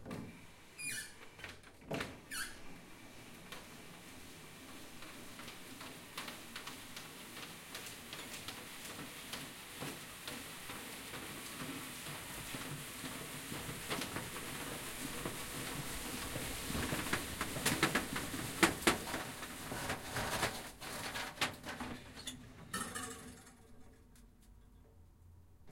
Queneau Fermeture rideau.1
ouverture et fermeture d'un store à manivelle
mechanical, open